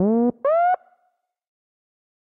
101 Dry glide synth 01
crunchy synth riff
free, heavy, riff, synth